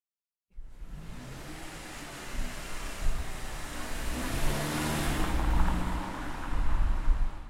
car in turn
The sound of the car turning to the street.
engine, vehicle, driving, car